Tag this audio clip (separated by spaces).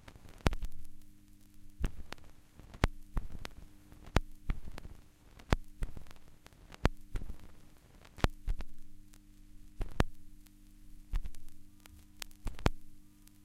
turntable skip